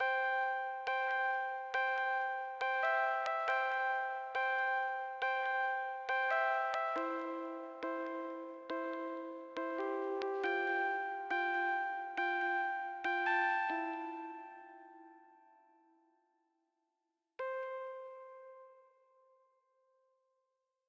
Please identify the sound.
Bright Rhodes Melody
Blissful rhodes melody which is ideal for lead or chords.
Made in Fl Studio 12.
Ambient, Blissful, Bright, Chords, Keys, Loop, Melody, Rhodes, Sample